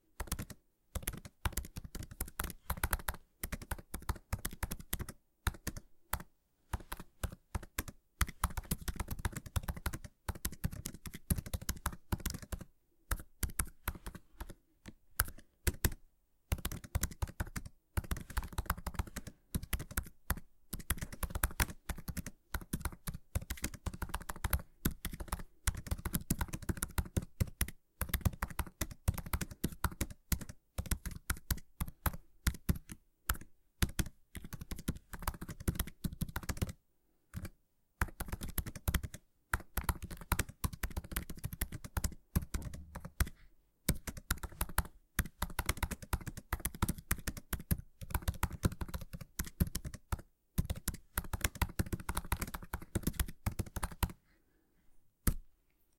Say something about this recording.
keyboard typing
Someone typing on the keyboard of an Apple Macbook laptop. Mono track recorded with ZoomH4n. Background noise removed with Audacity.